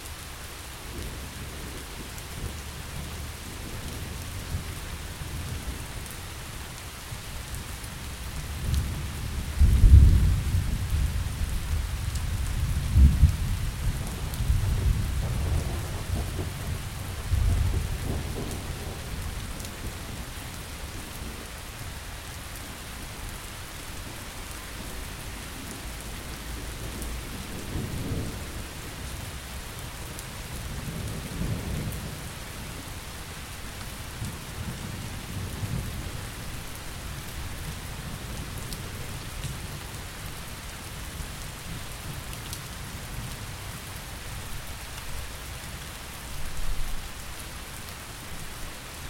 Rolling thunder.These tracks were recorded in a bungalow park with an USB mono microphone (Samson C01U). Only 44.1 - 16 sorry to say and mono.
rain, reverberations, rolling, thunder